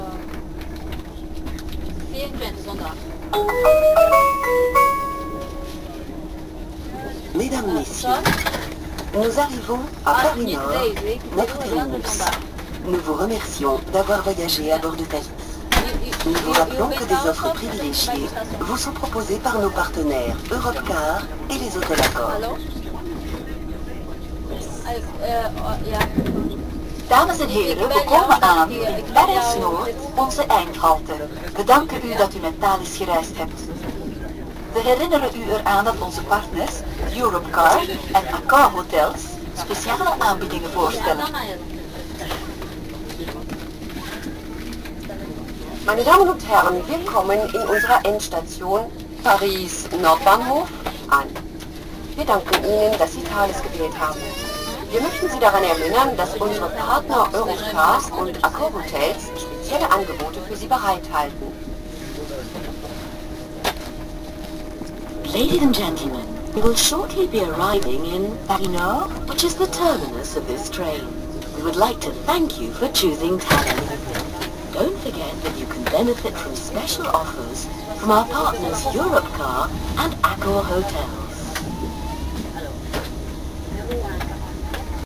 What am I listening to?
From summer 2008 trip around Europe, recorded with my Creative mp3 player.Announcement on train in 3 languages!
train, travel, field-recording